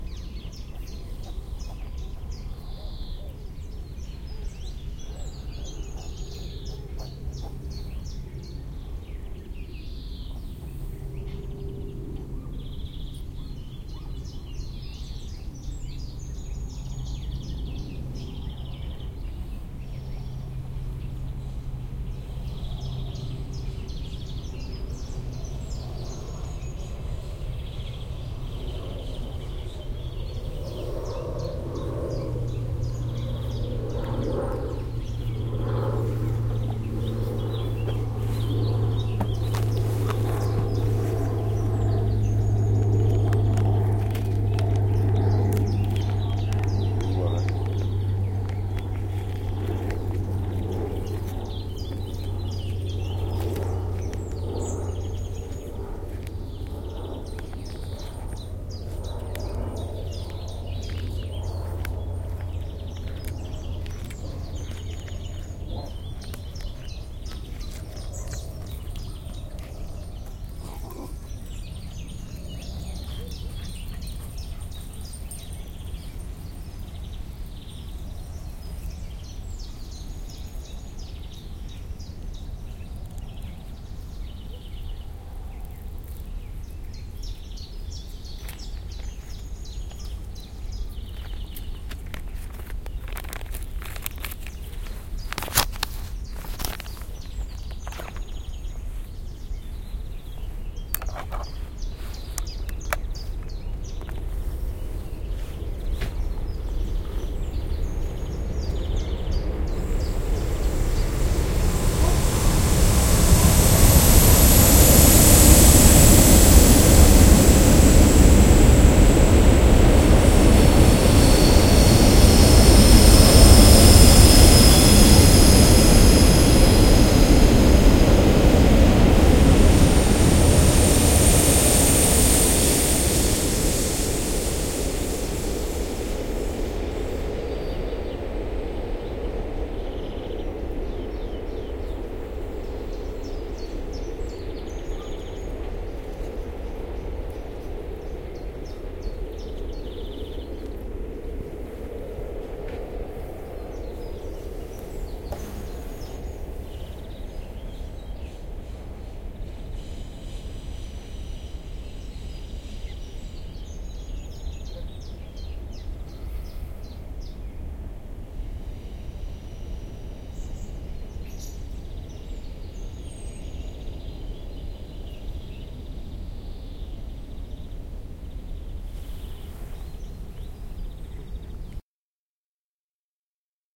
While an airplane passes I roll a cigarette just after I've said good morning to somebody who walks along the bench I'm sitting on. Then a freighttrain passes followed by a local train on the railway behind me. The birds keep singing. Recorded with an Edirol R09 early in the morning on the 3rd of may 2007.
nature, noise, percussive, street-noise, airplane, field-recording, street, engine, train, traffic